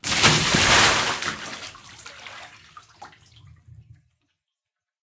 Water splash, emptying a bucket 9
I was emptying a bucket in a bathroom. Take 9.
bath, bucket, drops, hit, splash, water